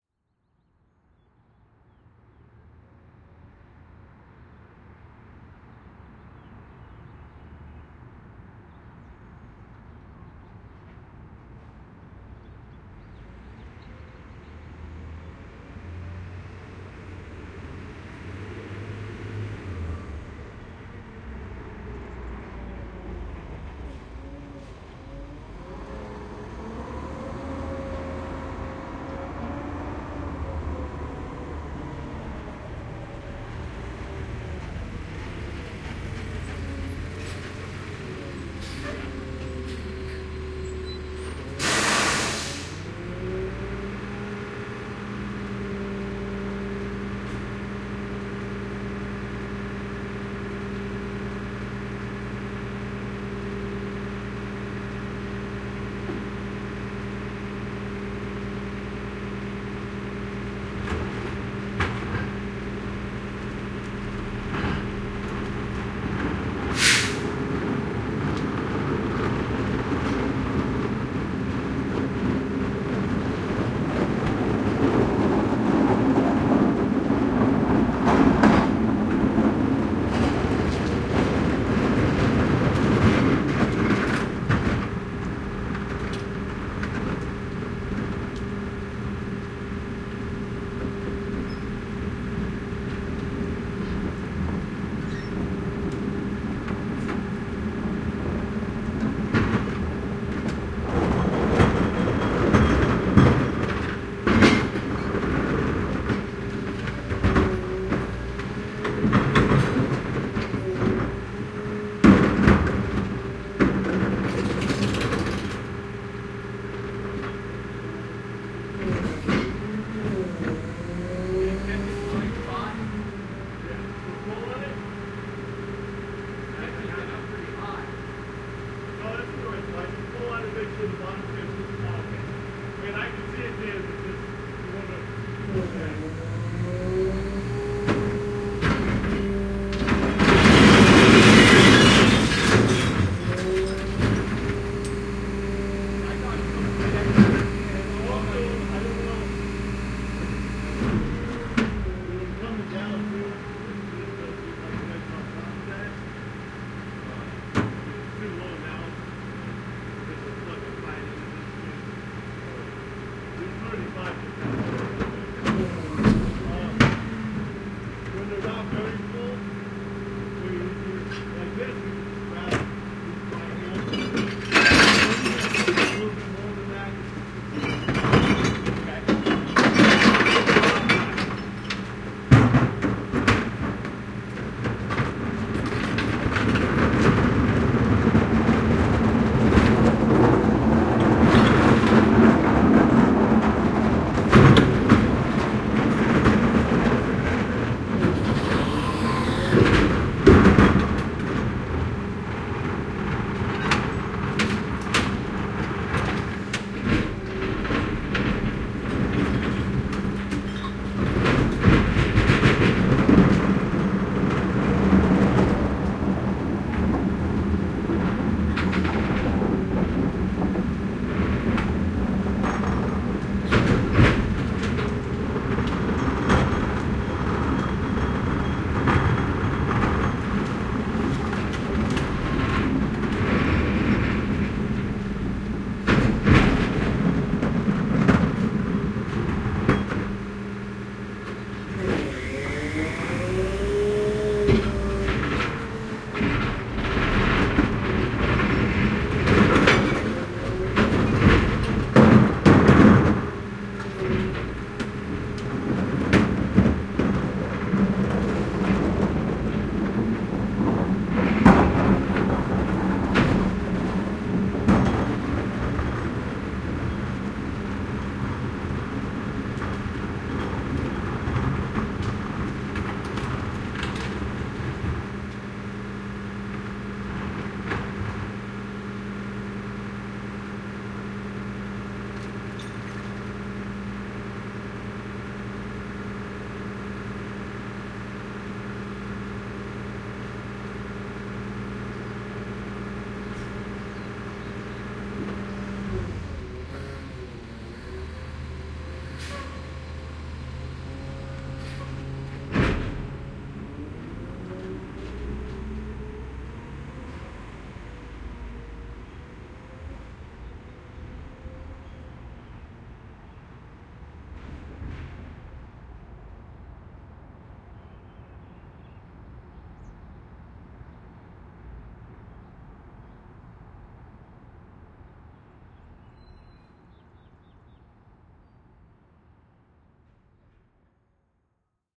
VEHMisc glass recycling truck 2022-07-04 TK SASSMKH8020
A glass recycling truck arrives, dumps a lot of glass into the truck, and then pulls away.
Microphones: Sennheiser MKH 8020 in SASS
Recorder: Zaxcom Maaxx